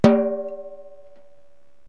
hi rack tom funk
My highest tom hit with half muffle.
drums, floor, funk, live, punk, rack, recording, rock, tom, toms